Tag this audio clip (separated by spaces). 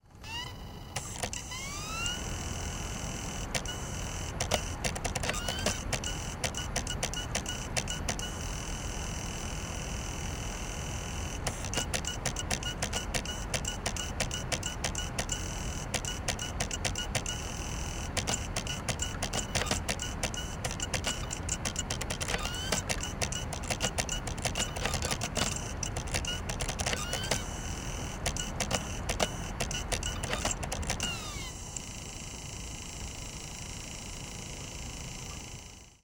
cdrom computer fan ibook laptop mac